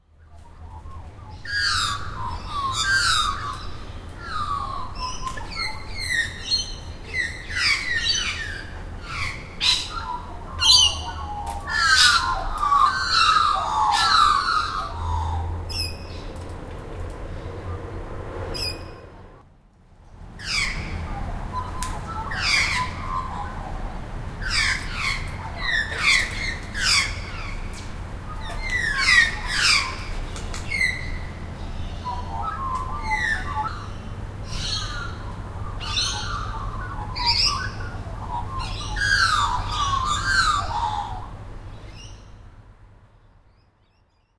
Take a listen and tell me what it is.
Australian Magpies morning song, as well as other birds.
Recorded on Zoom H1